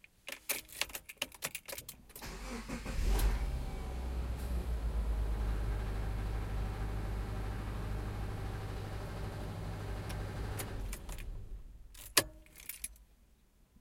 Interior sound of a car engine turning on and off.